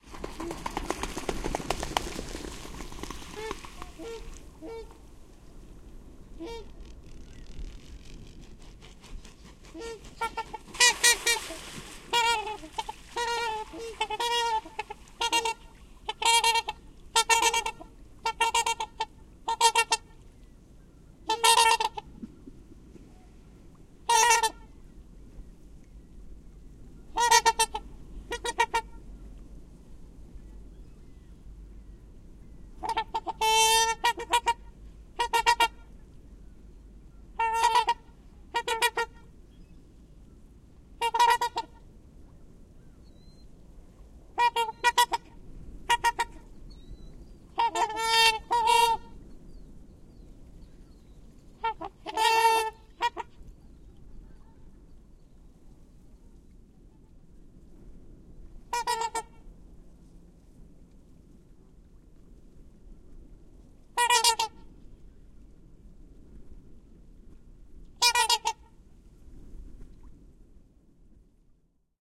Trumpeter Swans fly land splash loud calls 2pm TTP A 190401
Trumpeter Swans land on water and make distinctive honking calls. Homemade parabolic with EM172s.